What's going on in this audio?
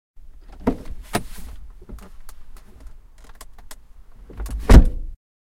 opening and closing car door